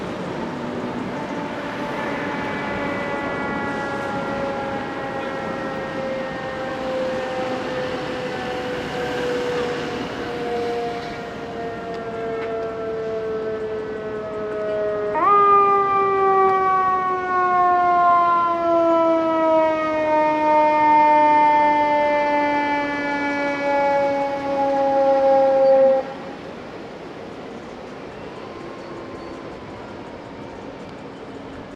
firetruck horn nyc slow